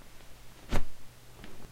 Some fight sounds I made...
fist, combat, punch, hit, fight, kick, fighting, leg